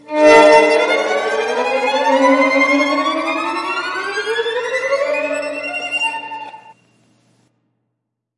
Violins Suspense 2
Violins playing glissando up slides on each of the 4 open strings (All at once!), But using tremolo articulations this time.
I did this by recording violin parts and stack them together, one on top of another in Audacity, then added reverb, and normalized.
This sound can be use for any kind of scary movie, scene, etc.